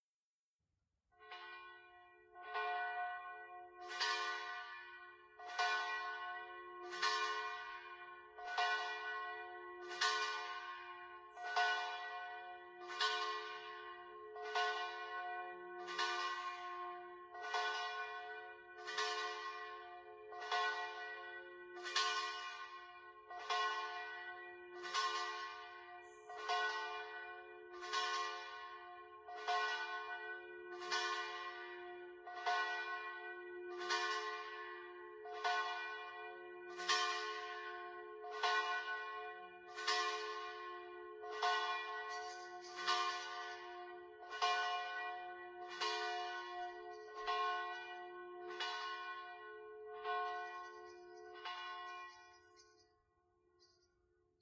H1 Zoom. Church bells just behind Hotel Belle Arti - some low rumble removed.